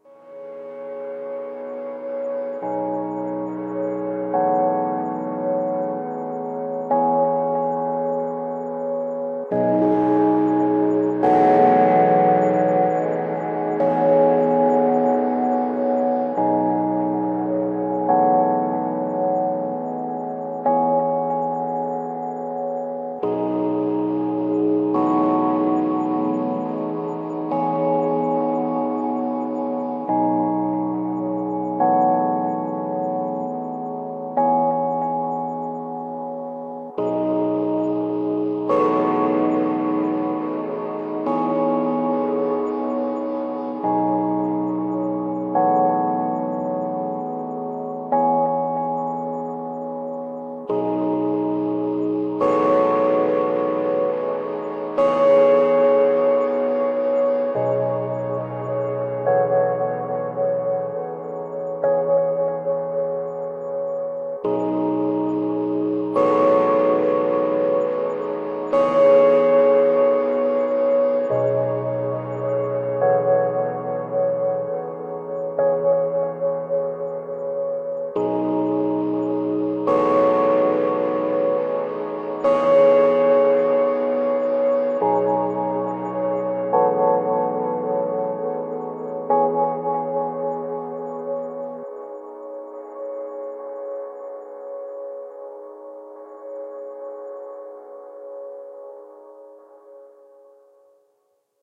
Old remix of this Rhodes piano sample that I felt needed a reupload.
License -
ambient, atmosphere, dance, delay, drums, effect, electronic, loop, loopmusic, Mix, music, pad, piano, recording, reverb, sound, space, stab, synth, trance